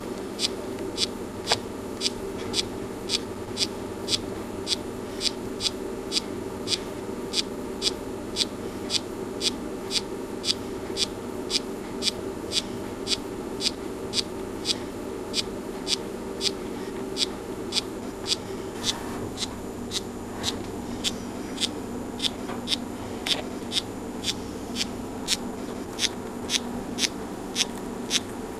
We got wood brought to our house from our woodman, and we brought a few armloads inside because we are already building fires. In the middle of the night, the woodbin started to make a strange noise. I figured it is some sort of bark chewing insect, but I have not seen it yet.